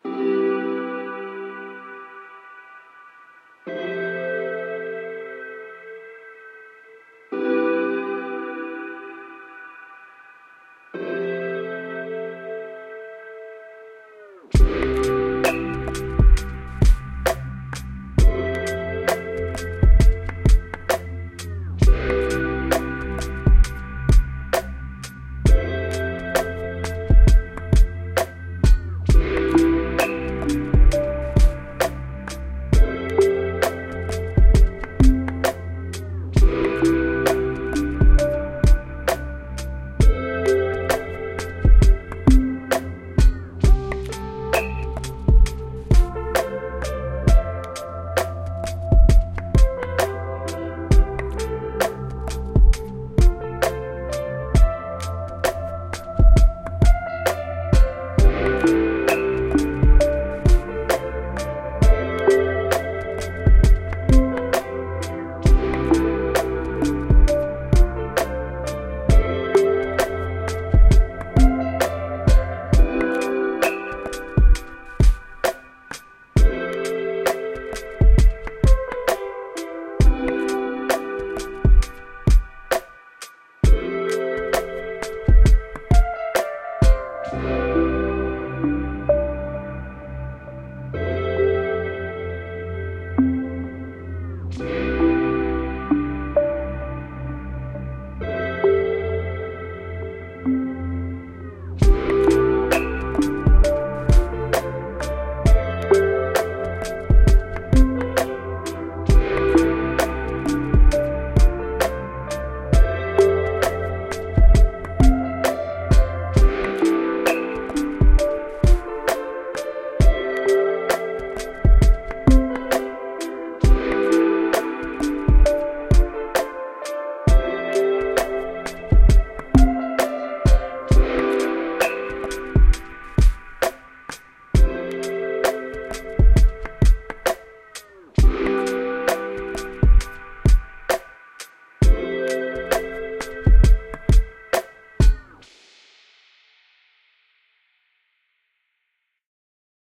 chill background music for something.